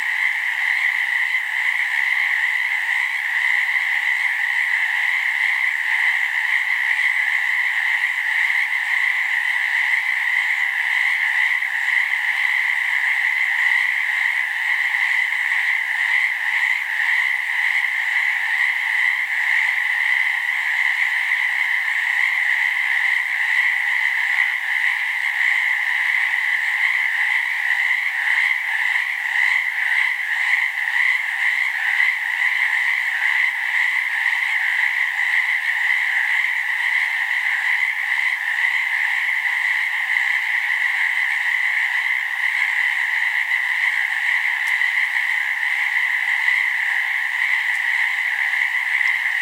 recorded up in the B.C. (Canadian) mountains in spring, Sterling ST77 mic, one long recording was divided into two, to create stereo. Recorded into Twisted Wave on a MBPro laptop.
frogs, pond, field-recording, chorus, wilderness